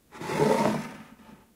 Glass Slide 5
Me sliding a glass cup around on a wooden surface. Check out my pack if this particular slide doesn't suit you!
Recorded on Zoom Q4 Mic
scrape, wood, glass-scrape, glass, wood-scrape, slide